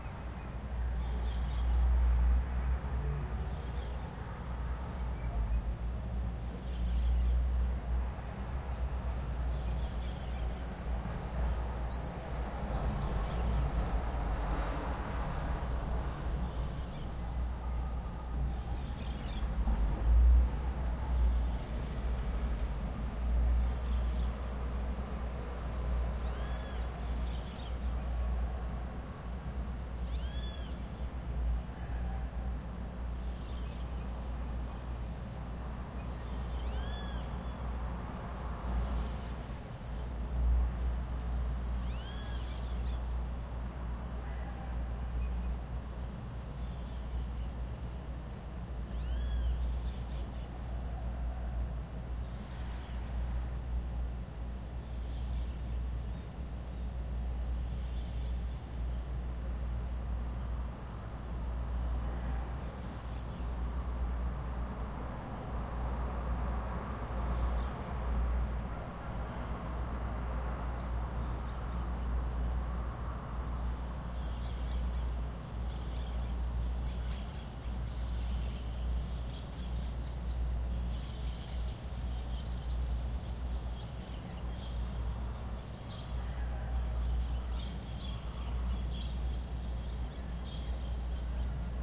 Early Morning at a downtown neighborhood Was a work day.